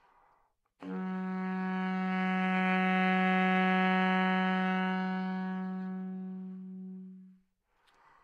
Sax Baritone - A3 - bad-dynamics
Part of the Good-sounds dataset of monophonic instrumental sounds.
instrument::sax_baritone
note::A
octave::3
midi note::45
good-sounds-id::5360
Intentionally played as an example of bad-dynamics